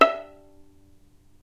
violin pizz non vib E4
violin pizzicato "non vibrato"